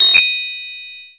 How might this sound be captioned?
PPG 006 Digital Mallet C6
This sample is part of the "PPG
MULTISAMPLE 006 Digital Mallet" sample pack. It is a short bell sound
with some harsh digital distorion above it, especially at the higher
pitches. In the sample pack there are 16 samples evenly spread across 5
octaves (C1 till C6). The note in the sample name (C, E or G#) does not
indicate the pitch of the sound but the key on my keyboard. The sound
was created on the PPG VSTi. After that normalising and fades where applied within Cubase SX.
ppg, digital, bell, short, multisample